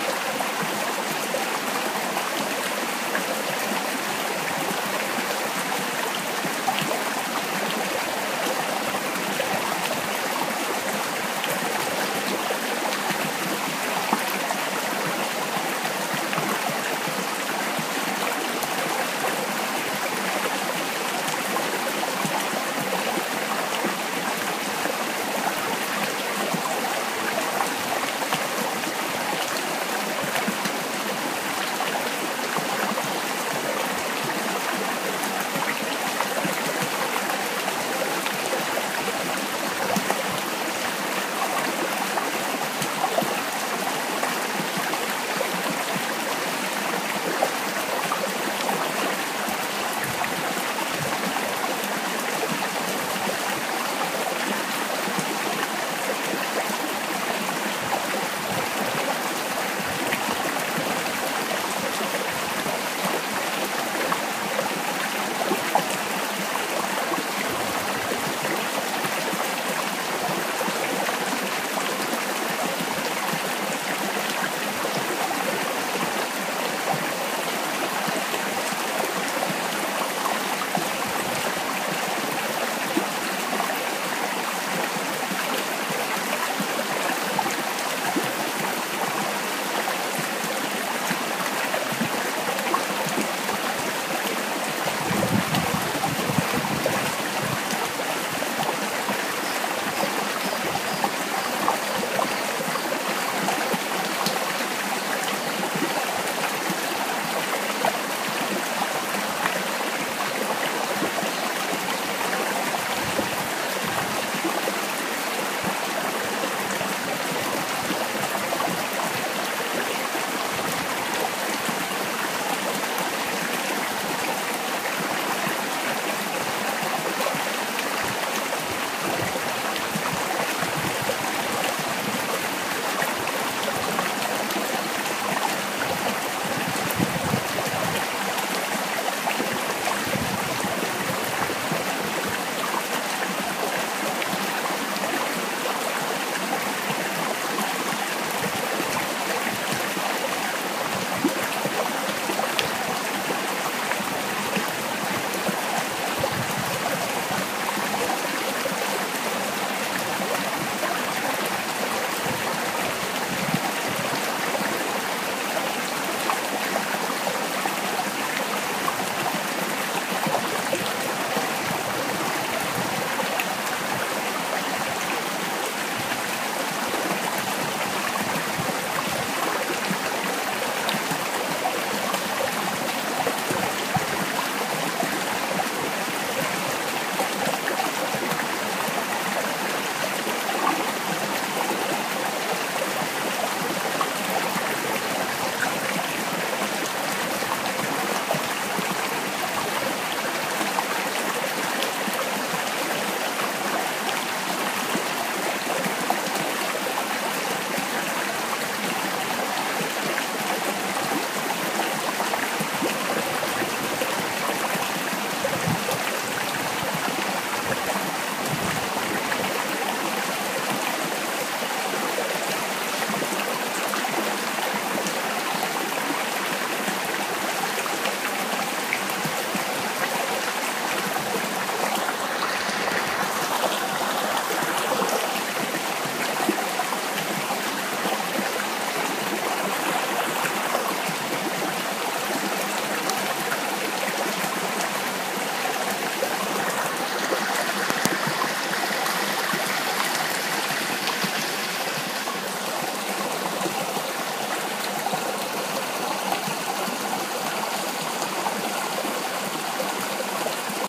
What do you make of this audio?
iPhone recorded. A beautiful stream in a red rock canyon in the Canaan Mountain Wilderness of Southern Utah.